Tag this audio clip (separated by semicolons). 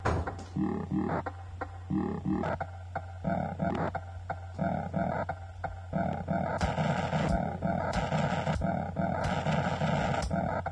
bent; circuit; freaky; glitch; techno